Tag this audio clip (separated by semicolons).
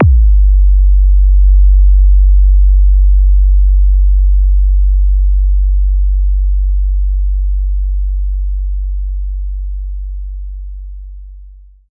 deep; kick; low; sub-bass